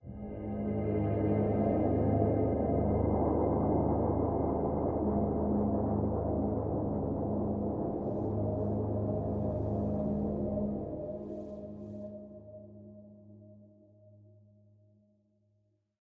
As an internship at the Utrecht School of the Arts, Adaptive Sound and Music for Games was investigated. For the use of adaptable non-linear music for games a toolkit was developed to administrate metadata of audio-fragments. In this metadata information was stored regarding some states (for example 'suspense', or 'relaxed' etc.) and possible successors.
The exit-time (go to next audio-file) is at 10666 ms
ambience, chill, dark, drone, drones, fragment, game, game-music, music, non-linear, non-linear-music, static, suspense, synthesized, synthesizer, underwater, water